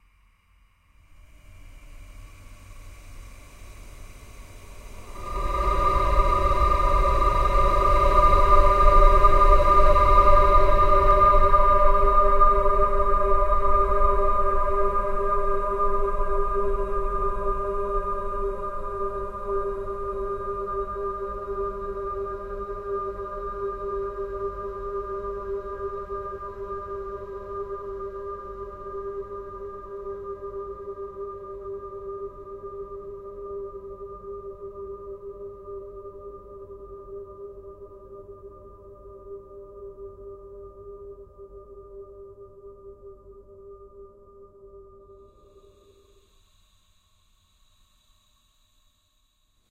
ambience 01 black
Sound 1/4, the lowest note - thus black, of my epic ambience pack.
Created in Audacity by recording 4 strings of a violine, slowing down tempo, boosting bass frequencies with an equalizer and finally paulstretch. Silence has been truncated and endings are faded.
ambiance, ambience, ambient, atmos, atmosphere, deaf, dramatic, drone, numb, soundscape, space, speechless, suspense, tension, thrill